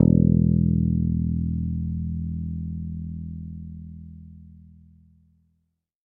First octave note.